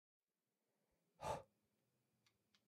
Blowing Out Candle harsh
Blowing out a candle. Recorded with an H4N Recorder in my bedroom.
Candle, blowing, human